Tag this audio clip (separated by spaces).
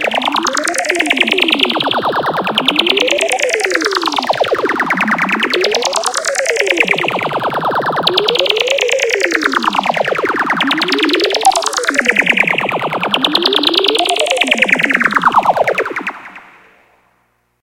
Factory Synthetic noise alien Space Sound-design Sci-Fi